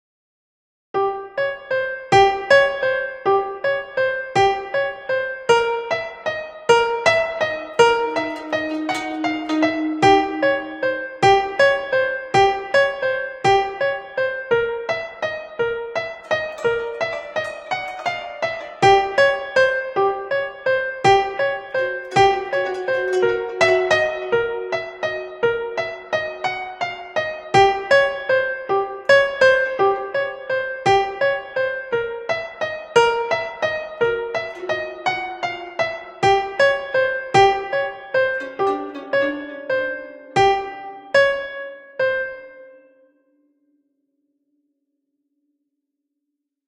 Haunting piano melody
Came up with this piano melody in GarageBand for something called Victors Crypt. It's a lot of effects on it and some strings in the background to make it scary. Might be suited for haunting, scary, evil, horror-stuff ;).
Eerie, Spooky, Creepy, Melody, Murder, processed, Wicked, Thriller, Haunting, Atmosphere, Haunted, Ghost, Ambient, Killer, Dark, Evil, Monster, Piano, Scary, Halloween, cinematic, Horror, sci-fi